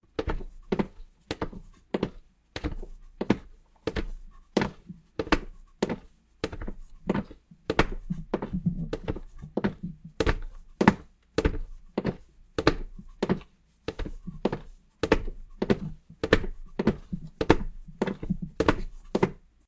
Walking with slipper on the wooden ground. Recorded with a Blue Yeti.
walk, slipper, walking, floor